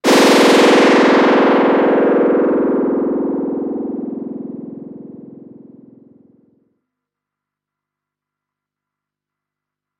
Lazer sound generated with multiple square waves form modular synth. PWM and frequency modulation on both sources, mixed with white noise.
lazer, sound-design, sci-fi, game-design, sound-effect, weapons, science-fiction, gun